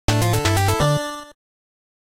A sound clip made in Famitracker to show when something in won, gained, completed, or achieved